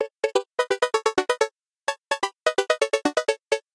SYNTHS MELODY
melody, music, dance, producers, electronic, synth